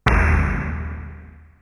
industrial big tom12
industrial big tom